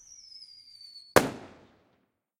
Whistle and Explosion Single Firework
Single whistle and pop of a firework-rocket. This sound is isolated from one of my long recording with multiple fireworks exploding (Explosions and fireworks).
Recorded with a Tascam DR-05 Linear PCM recorder.